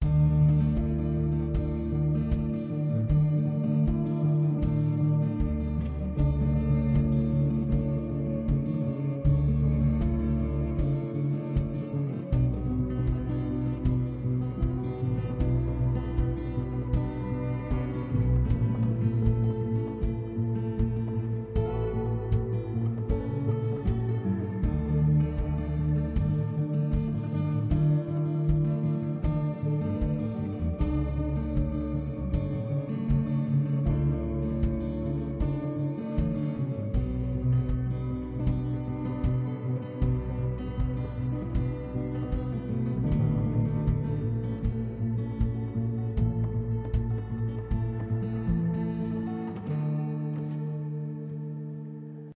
Guitar Music
Recorded, Music, Background, Guitar, Loop